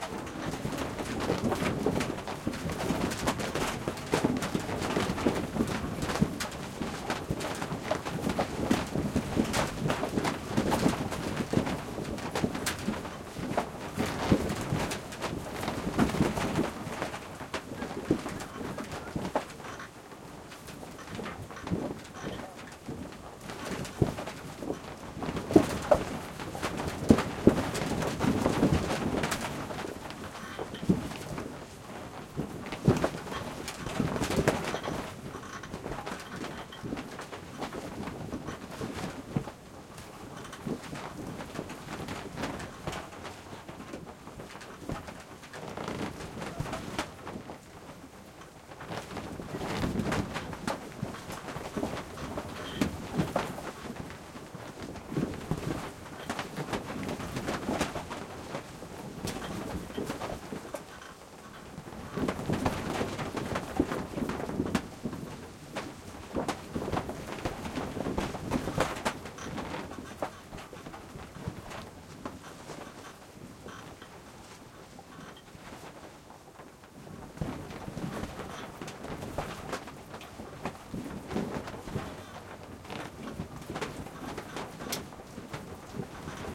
windy tent
Microphone inside an old fabric tent with wooden tent pegs. Vibrations under the desert wind.
Palmyre, Syria, 2007.
Recorded with stereo XY Audiotechnica AT822 microphone
Recorded on Tascam DAP1
gusts, inside, tent, wind, windy